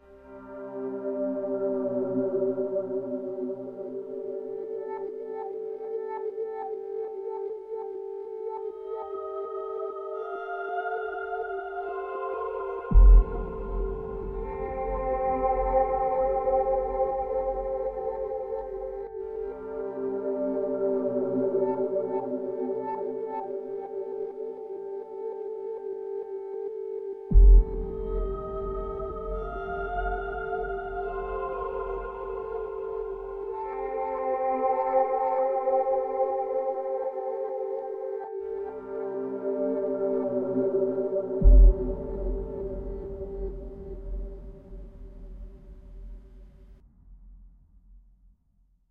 Eerie and/or Creepy Music
An eerie sounding piece of music.
scary, creepy, scoring, weird, sinister, spooky, soundtrack, horror